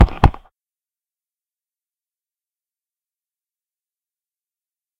hit-mic05
Something bumping the microphone.
Recorded with a cheap microphone, and cleaned up with Audacity.